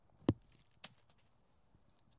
Single Rock hit Dirt
Single hit of rock on dirt intended to use for bullets hitting ground.